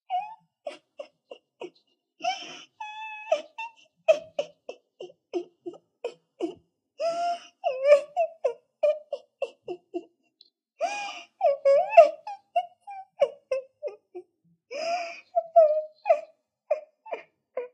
crying girl
Me, making a girl’s cry
speech, text, sad, vocal, spoken, girl, words, talking, saying, crying, voice, talk, english, female, speaking, girl-crying, woman, speak, worried, sentence, word, upset